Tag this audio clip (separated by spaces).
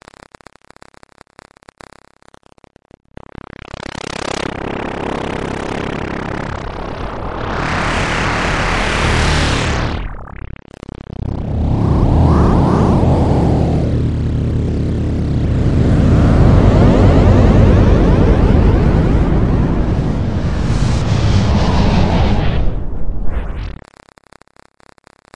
artificial synthesis granular sound ambient stereo free sample